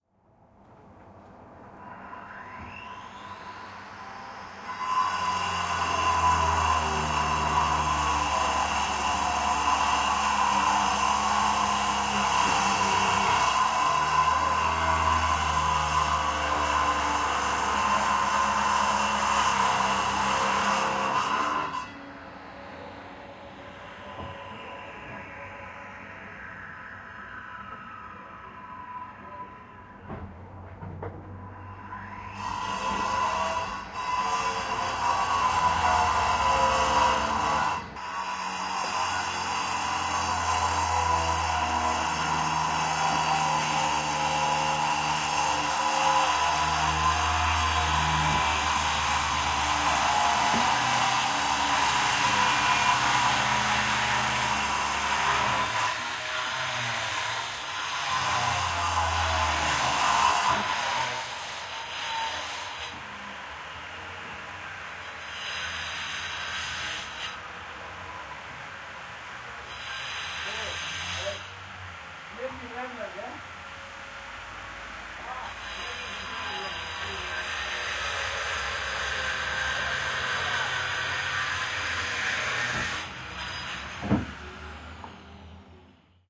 02.10.2015 cutting autoclaved cellular concrete
02.10.2015: around 15.00 p.m. Fieldecordnig made during the ethnographic research on the national road no. 92 in Torzym (Poland).Sound of cutting autoclaved cellular concrete by some workers. Recorded in Motel Chrobry.
fieldrecording, machine, noise, Poland, Torzym, workers